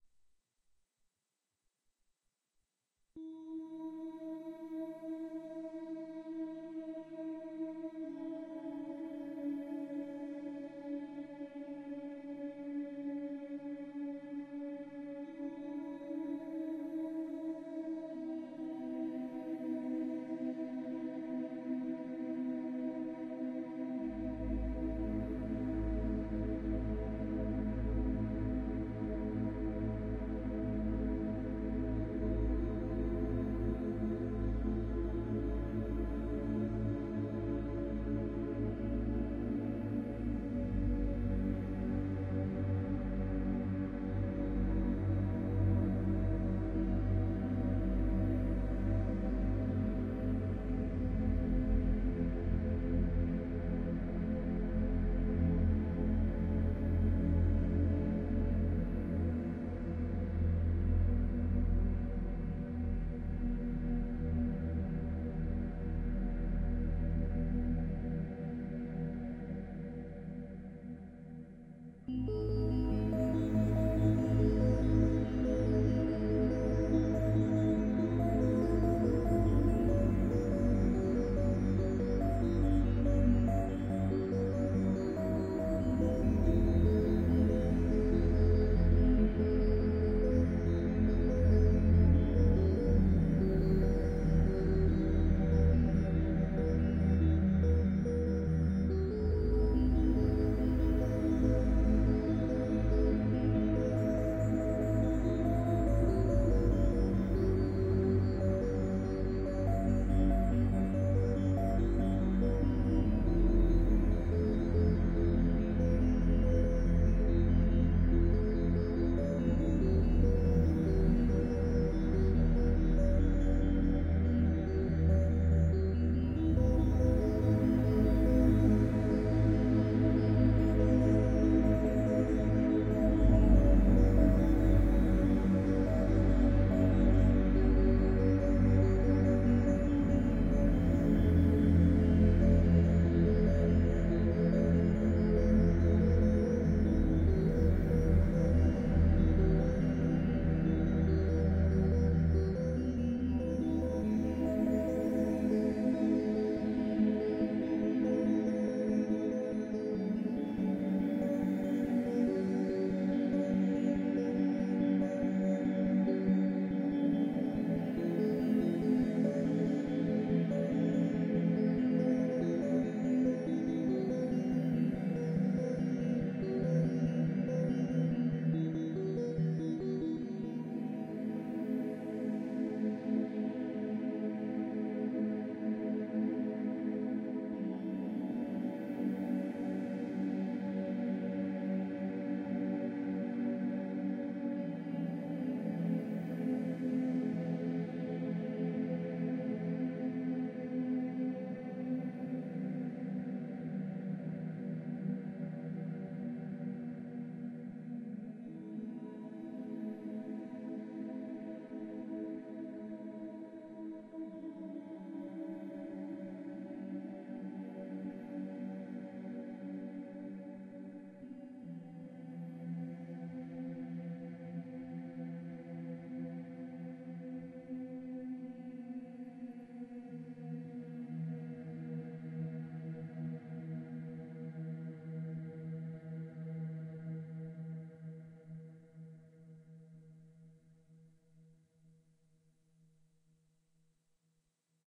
relaxation music #41
Relaxation Music for multiple purposes created by using a synthesizer and recorded with Magix studio.
meditation, meditative, relaxation, relaxing